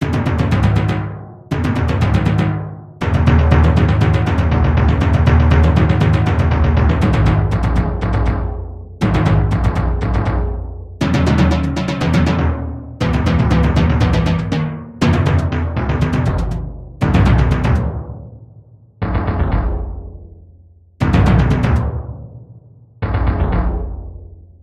justbeats1akj
Created drumbeats loop. Created with Musescore.